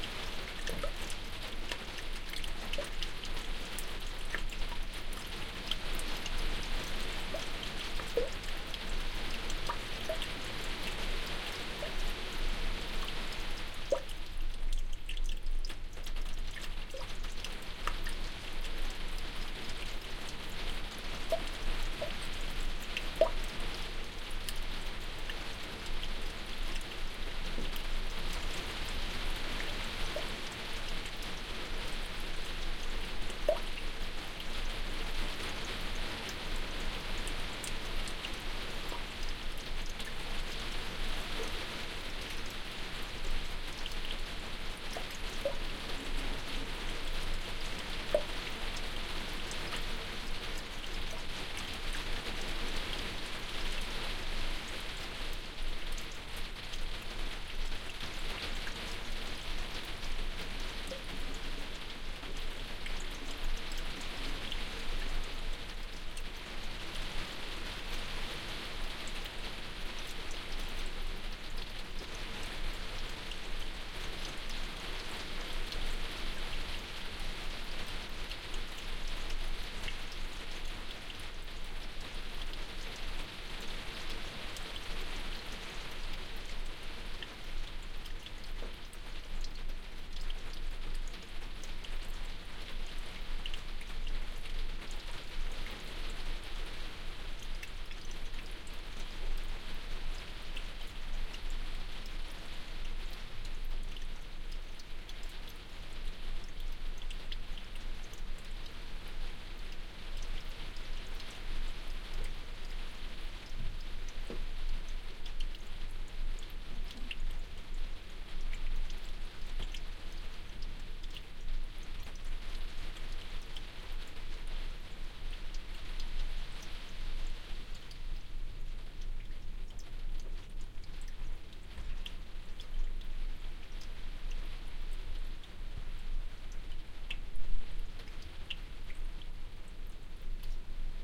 Rain in our porch, one microphone is facing the rainpipe, the other the roof. PCM-D50 and EM172 microphones.